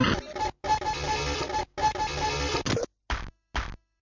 casio ct-395 circuit bent